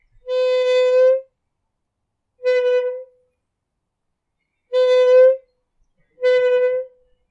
Metal Gate Squeak
Squeak Squeak!
(I’m a student and would love to upgrade my audio gear, so if you like/download any of my audio then that would be greatly appreciated! No worries if not).
Looking for more audio?
gate, metal, metal-gate, squeak, squeaky, squeek, squeeky